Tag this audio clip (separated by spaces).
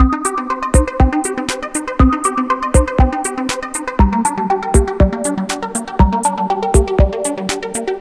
ambient electro loop